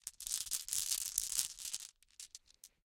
Glass marbles being shuffled around in cupped hands. Dry, brittle, snappy, glassy sound. Close miked with Rode NT-5s in X-Y configuration. Trimmed, DC removed, and normalized to -6 dB.
shuffle, marble, hand